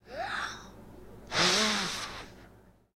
popscreen test
This is a test. I asked my son to take a deep breath and blow air over a microphone from about 1 inch away. I built a home-made "zeppelin" pop-screen for my RE50 using some foam, a medium sized plastic soda bottle (with holes cut in an alternating pattern) then covered with a very fuzzy black sock. I plan to use it for outdoor recording in windy conditions.
soundeffect, human